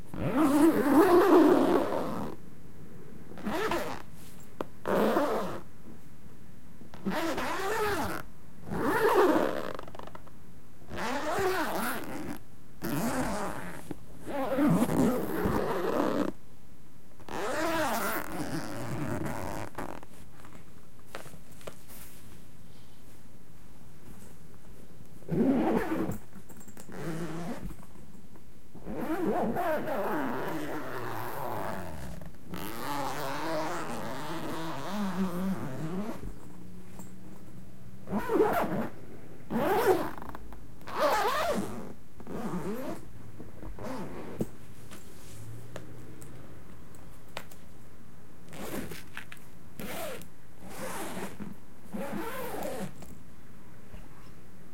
Sounds of the zippers of a violin case. Recorded with a Zoom H4n portable recorder.